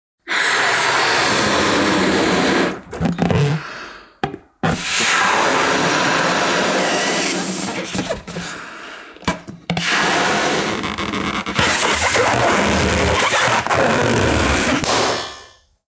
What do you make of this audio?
Baloon Randomness 1
Drum, h5, Zoom